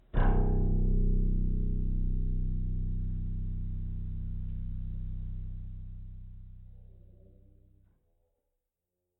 Jarring Bass Sound
A cool and very much slowed down guitar note, recorded not long before my guitar broke (it was old and it cracked). I accidentally made it and I thought it sounded cool I guess
it can be used for a jarring moment or a suspenseful sound or something like that
im sad rn (´。